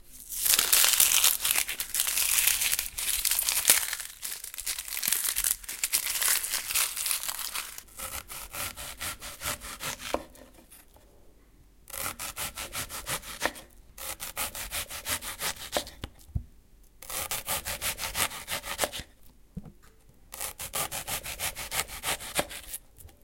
Cutting vegetable onion
Cutting vegetables - an onion - on a board in the kitchen.
slice, cut, onion, kitchen, knife, cook, fruit, cooking, cutting, food, vegetables, slicing, vegetable, board